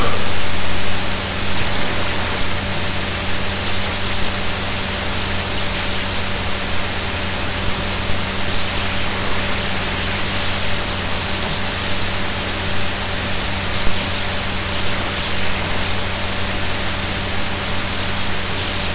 Normalized Netbook Silence
Just letting arecord -f cd record some silence in a room using an Asus EeePc 1000H. Normalized in Audacity.